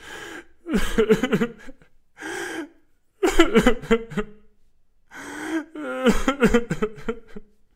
A 34 year old male crying authentically